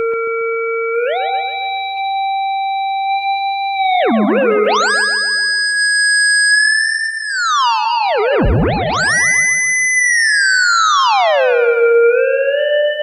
Added some echo for that vintage scifi sound.
free, mousing, sample, sound, theremin